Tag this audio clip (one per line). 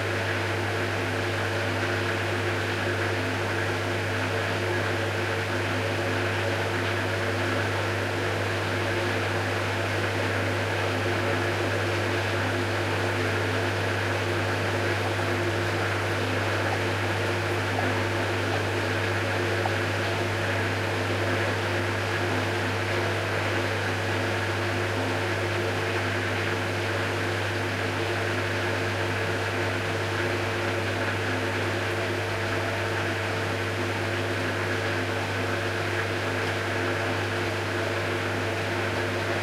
cycle
machine
rinse
soap
wash
washing
washing-machine
water